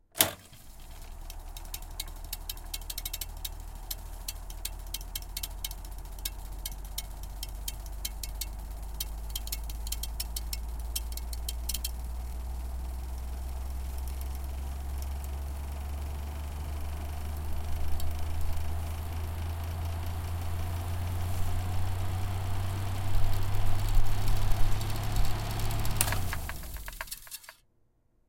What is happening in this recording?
reel to reel tape machine start stop rewind nice end
machine, reel, rewind, start, stop, tape